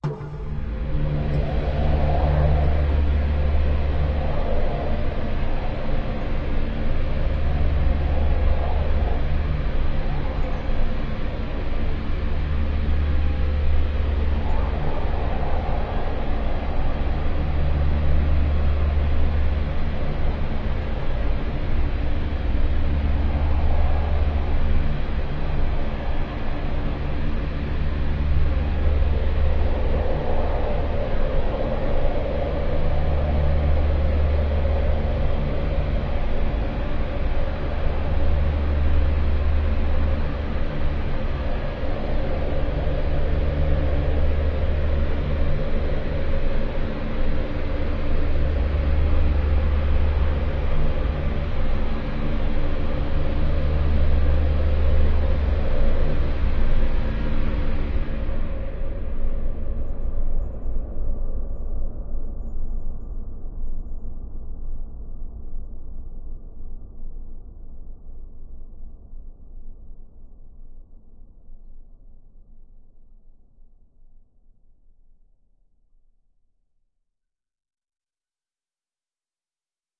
LAYERS 006 - Chrunched Church Organ Drone Pad - D2
LAYERS 006 - Chrunched Church Organ Drone Pad is an extensive multisample package containing 97 samples covering C0 till C8. The key name is included in the sample name. The sound of Chrunched Church Organ Drone Pad is mainly already in the name: an ambient organ drone sound with some interesting movement and harmonies that can be played as a PAD sound in your favourite sampler. It was created using NI Kontakt 3 as well as some soft synths (Karma Synth) within Cubase and a lot of convolution (Voxengo's Pristine Space is my favourite) and other reverbs as well as NI Spectral Delay.